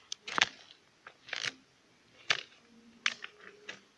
The sound made while walking on a wood floor